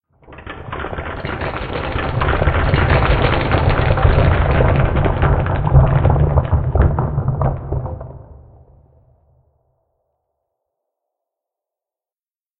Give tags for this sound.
crush
collapse
cave
tunnel
tumble
egg-shells
crunch
crack
rocks
mine
grit
cave-in

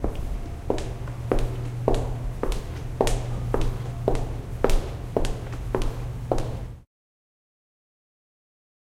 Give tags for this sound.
heels
shoes

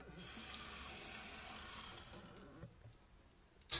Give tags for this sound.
random
taps
brush
hits
objects
scrapes
thumps

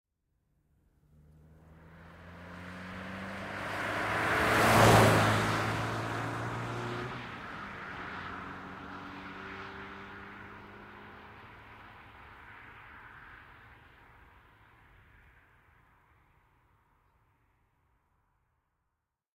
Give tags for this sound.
auto
speed
street
vehicle

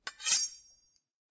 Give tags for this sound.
slide
blade
metal
friction
metallic

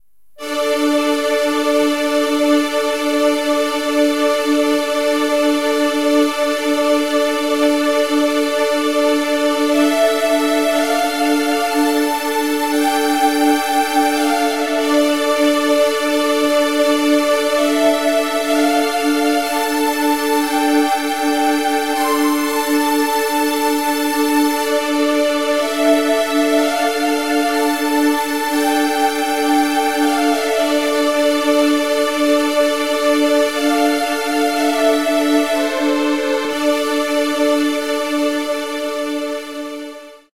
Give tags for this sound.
fi
film

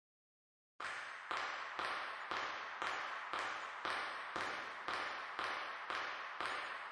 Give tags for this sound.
walking
footsteps
shoes

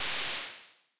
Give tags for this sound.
EDM
Crusher
Derezzed
Lazer
Bit
Punk
Daft
Beam
Percussion

